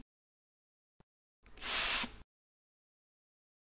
Sound of household chores.
chores, household, Pansk, CZ